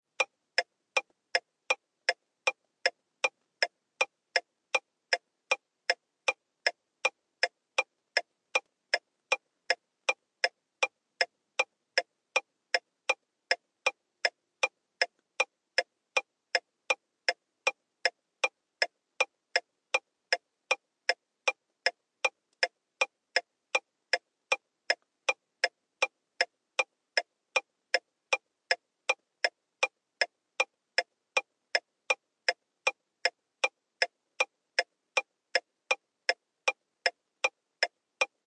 recording of a car's emergency lights beeps